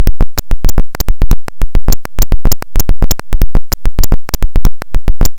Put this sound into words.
The first sound from my new Mute Synth 2. Just bought it. This was the first sound it made.
analogue, click, clicking, electronic, Mute-Synth-2, Mute-Synth-II, noise